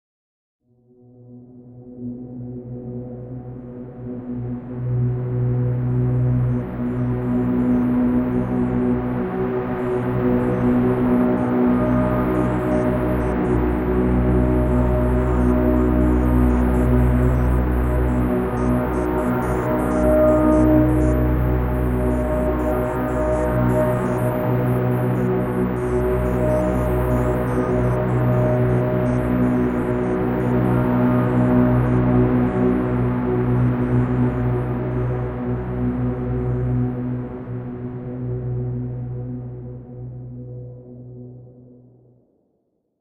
Drone Ambient Glitch
Drone, ambient